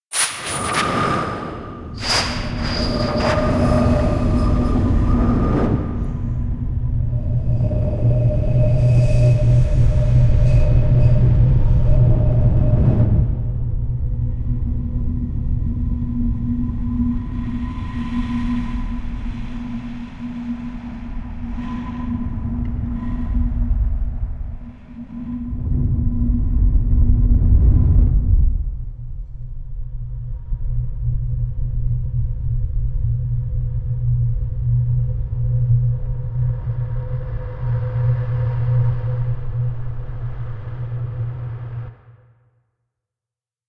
bottle blow processed
I recorded the sound of myself blowing into an empty glass kombucha bottle in various ways. I then processed through ableton's simpler, added compression, reverb and EQ
Mic: Blue Yeti
DAW: reaper + ableton
blowing, eerie, wind, blow, fx, bottle-blow, processed, effect, effects, sound-design, glass-bottle, experiemental